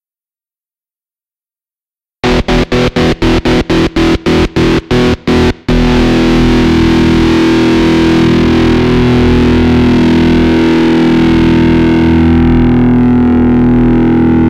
The sound of a live guitar, two pickups with one pickup turned off. Rapidly switching between the one that is off and the one that is on. You know you have heard this technique used before on all the 70's rock albums.